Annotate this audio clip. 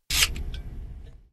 The sound my minidisk recorder makes sometimes while recording a new track or saving one.
click, minidisk